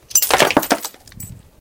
Breaking Glass 13
Sounds almost like there is some wood included in the materials because the glass is thick. Includes some background noise of wind. Recorded with a black Sony IC voice recorder.